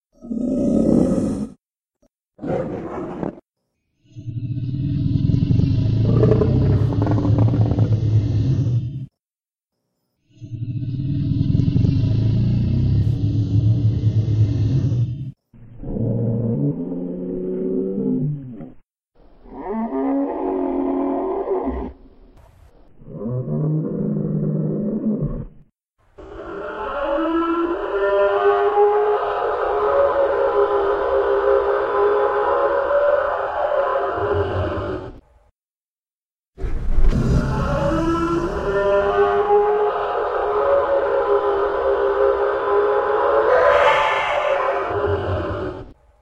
T-Rex Calls
T-rex growling, huffing, bellowing, and roaring.
I had to cover myself in Spinosaurus pee to get this. :b
animal, animals, dino, dinosaur, dinosaurs, growl, growling, prehistoric, rex, roar, roaring, T-rex, tyrannosaur, tyrannosaurus